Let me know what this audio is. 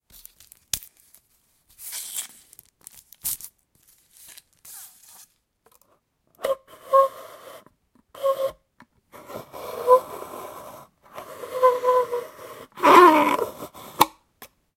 Pushing The Straw
A fresh straw being pushed into a soft drink cup and scraping along the plastic lid.
One of the few sounds that give me goosebumps on my arms ...
Cola, Straw, Food, Fast, Drink, Cup, Soft, Painful, Coke